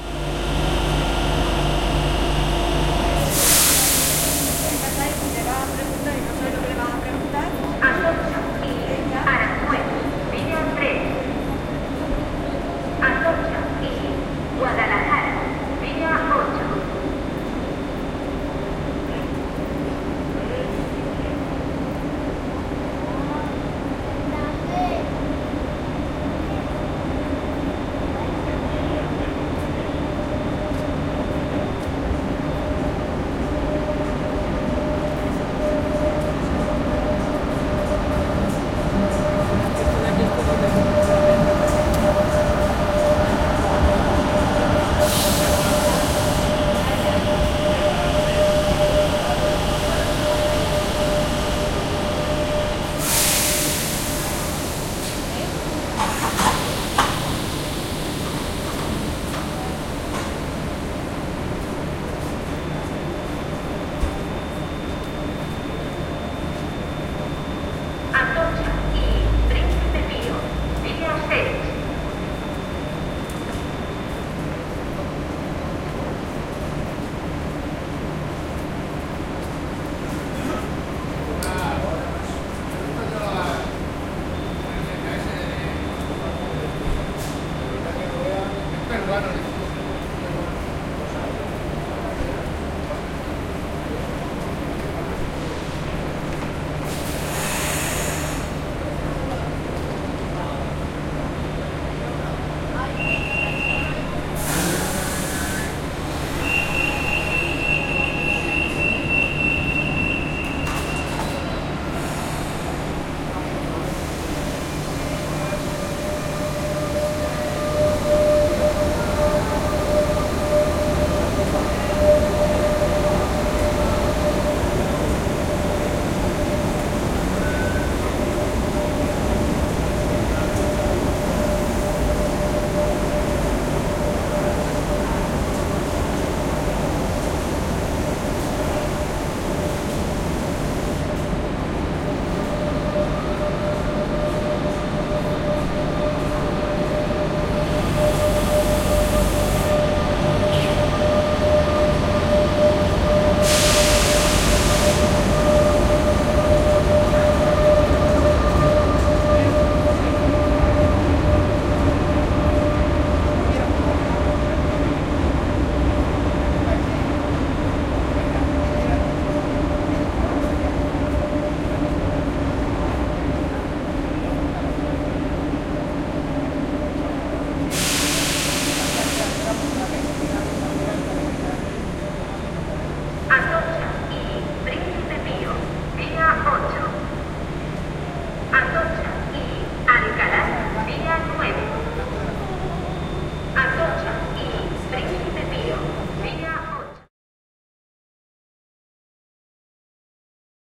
Estacion de Chamartin II Trenes Pasan Anuncios
de, Pasan, Espaol, II, Estacion